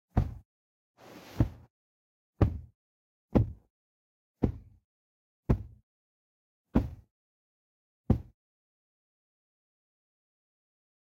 Pillow Hits
Hitting a pillow with another pillow
punch, kick, hits, pillow, impact, effects, hit